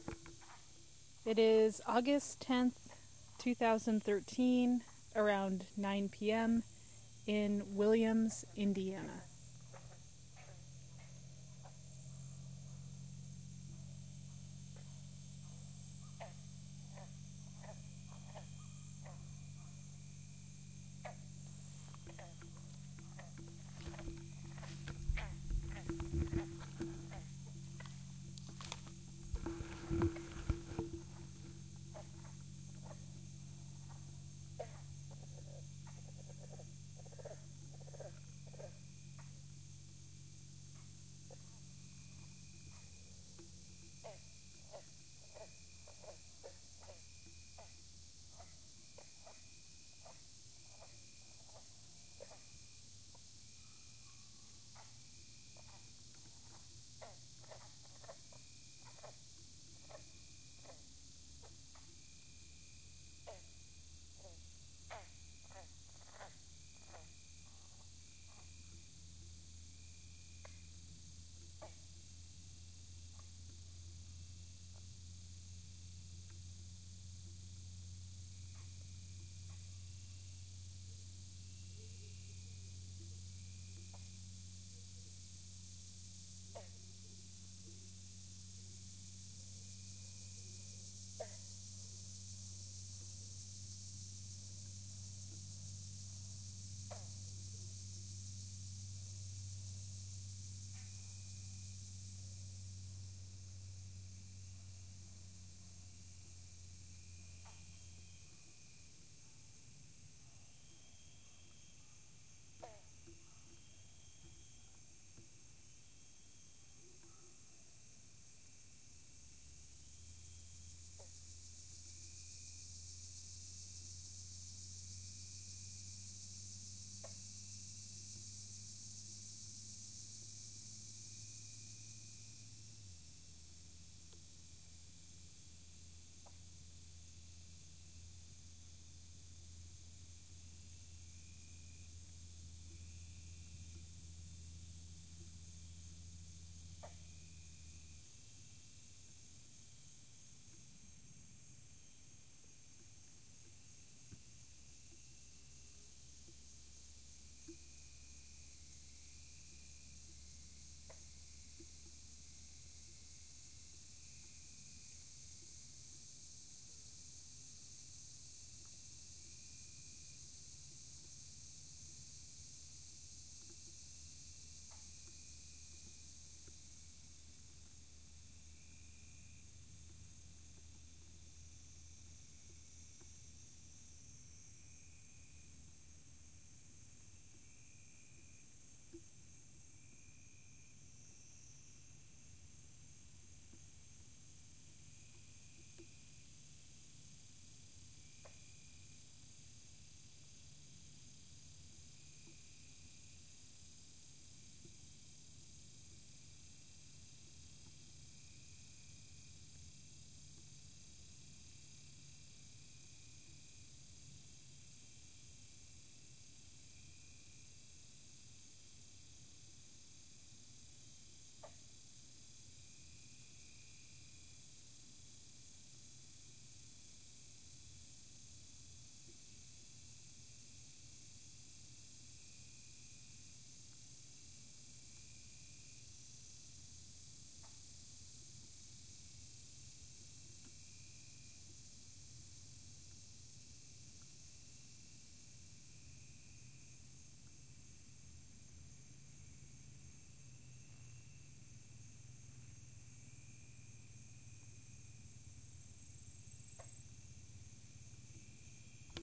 Williams Pond
Shortly after sunset on August 10th 2013 in Williams, IN
cicada, field-recording, high-summer, humid, Indiana, night-insect, peepers, pond